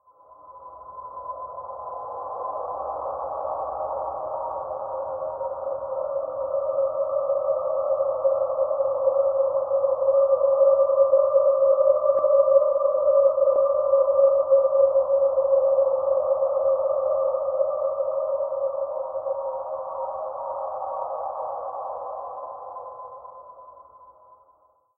Rewind AT * Rewind Speaking Convolve
ethereal, atmospheric, Mammut